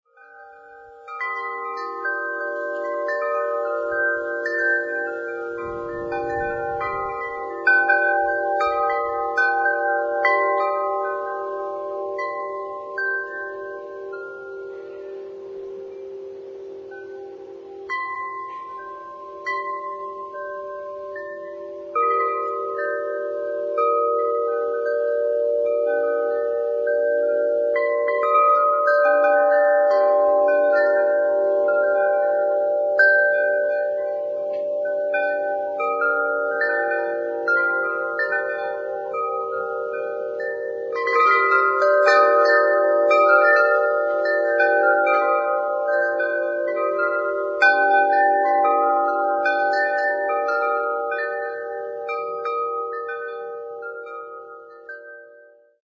Our Chimes
chimes, processed, wind